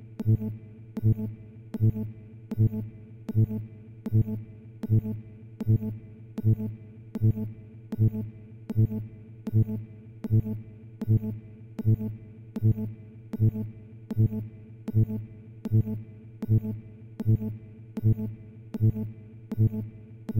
A futuristic alarm sound